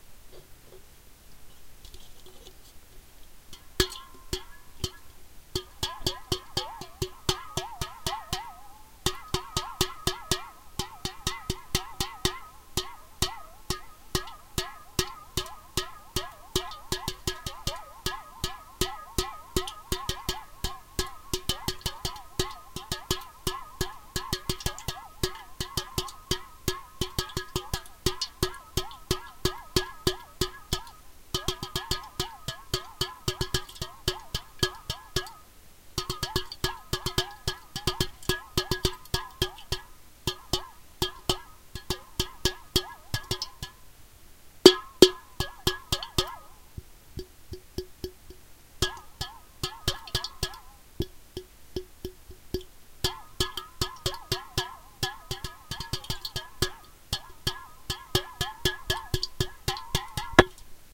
mostly empty soda can playing
Me tapping random rhythms on the side of a 7-up can held by it's tab at a 70-degree angle under the mic with the opening facing the mic. It has just a few drops left in it. The soda sloshes around verrying the tone with each strike. Recorded with cheap 12-year-old Radio Shack mic.
slosh, soda, booing, finger-nails, playing, metallic, lowfi, liquid, sloosh, metal, soda-can, random, boing, percussion, tapping, can, pop-can, rhythms